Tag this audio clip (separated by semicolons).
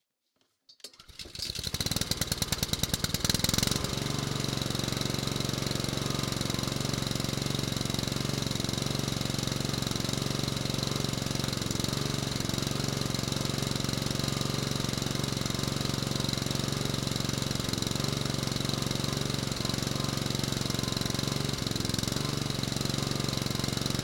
cutter; engine; lawn; lawnmower; motor; mower; startup